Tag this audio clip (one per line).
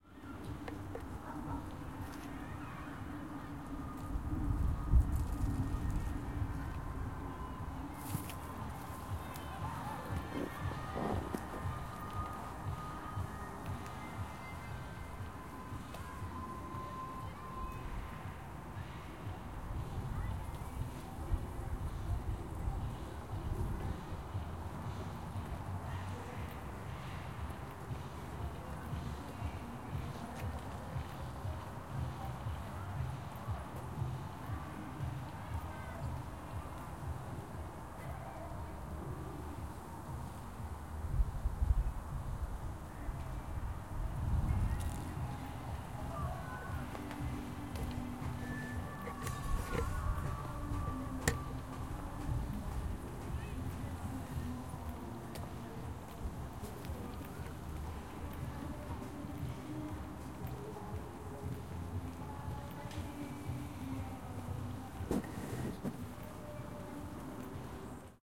ambient
field-recording